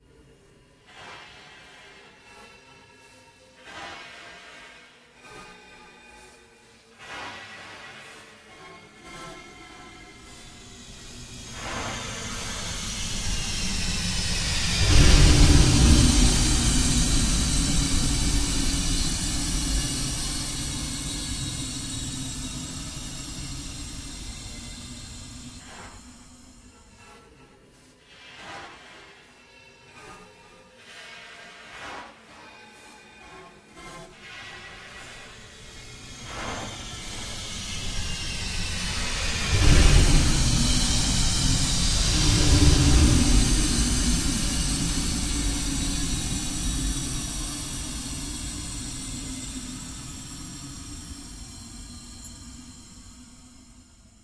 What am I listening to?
done on keyboard with audacity